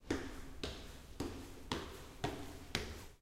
footsteps upstairs hard floor indoors
Footsteps walking on a hard floor upstairs indoors. This was recorded using a T-Bone large diaphragm condenser mic.